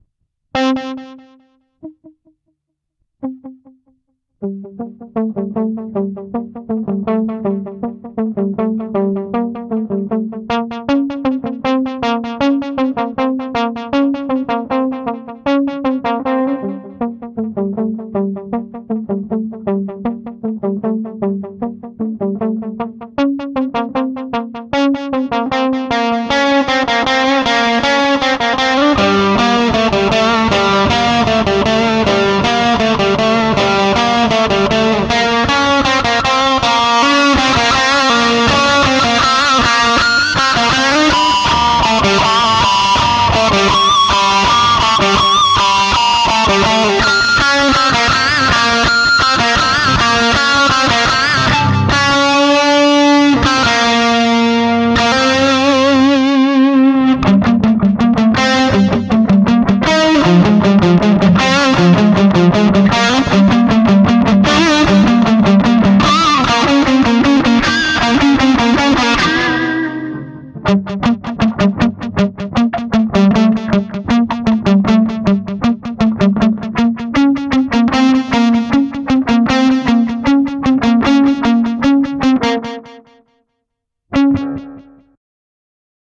Probably a rip off
it sure sounds like something you’ve heard a million times before but from what exactly? Something classical, maybe something modern? Could probably be chopped up into smaller segments.
cinematic, classic, distortion, drama, electric, file, guitar, movie, notes, score, soundtrack, strings